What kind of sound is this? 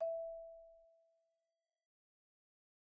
Sample Information:
Instrument: Marimba
Technique: Hit (Standard Mallets)
Dynamic: mf
Note: E5 (MIDI Note 76)
RR Nr.: 1
Mic Pos.: Main/Mids
Sampled hit of a marimba in a concert hall, using a stereo pair of Rode NT1-A's used as mid mics.